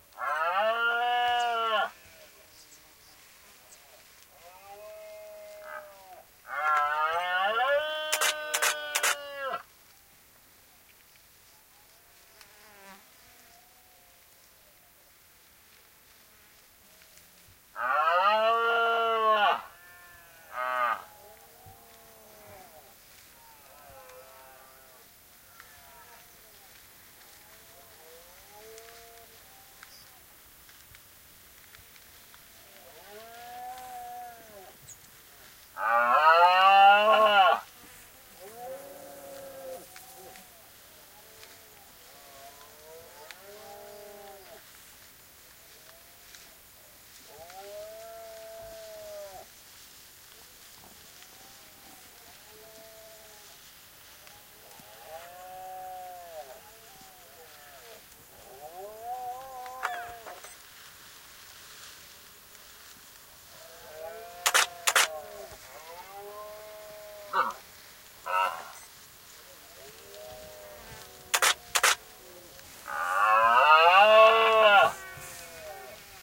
20100910.deer.watchers
inside a car, someone (Gustavo) takes pictures of roaring male Red-deer at Donana National Park (S Spain). The camera was a Nikon D300 with telephoto lens. Sennheiser MKH30 + MKH60 into Shure FP24 preamp, Olympus LS10 recorder. Decoded to mid-side stereo with free Voxengo VST plugin.
photography, field-recording, safari, nikon, shutter, camera, red-deer, male, nature, donana, rut, sex, roaring